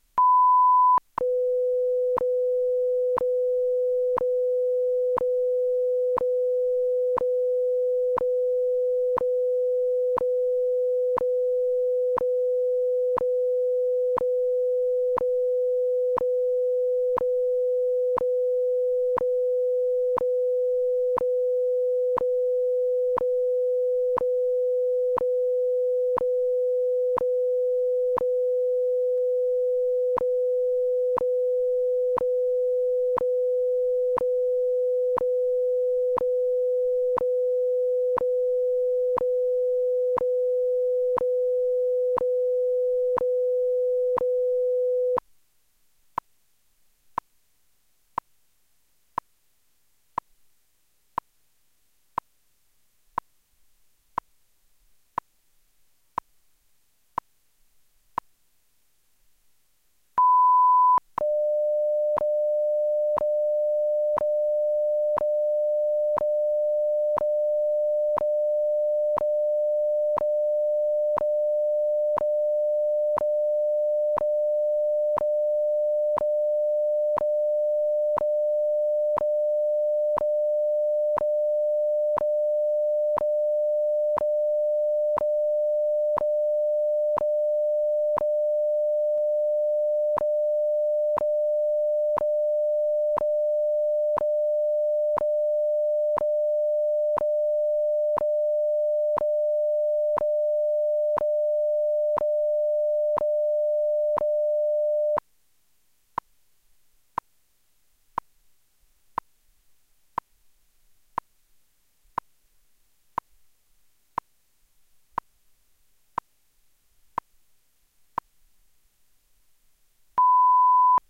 WWV-style time signal
This is a precise emulation of the audible time signal broadcast by radio station WWV of the NIST in the USA on shortwave. This recording was produced via a program I wrote that emulates the time signal and recreates it from scratch, but it conforms precisely to the specs of the real signal. The only part missing is the voice announcement of the time, which, in the real broadcast, is made during the last fifteen seconds of each minute (which is why the tone changes to just clicks during that time).
There are two minutes in the recording, but it should be easy to loop it or otherwise modify it for other durations. Since this audio file was computer-generated from scratch, it contains no noise, but the real broadcast has some static and noise, especially at large distances from the transmitter in Colorado.
Generated by special software / 48.1 kHz 16-bit stereo